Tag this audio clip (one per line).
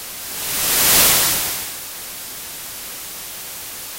ambience
effect
noise
swoosh
synthesized
waves